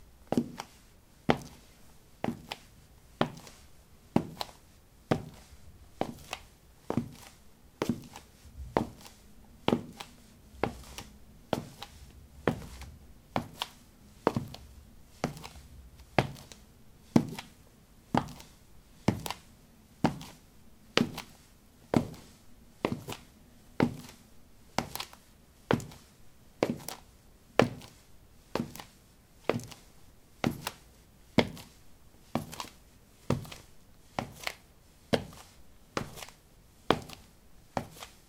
ceramic 08a womanshoes walk
Walking on ceramic tiles: woman's shoes. Recorded with a ZOOM H2 in a bathroom of a house, normalized with Audacity.
footsteps, steps